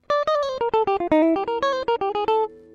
guitar be-bop2
Improvised samples from home session..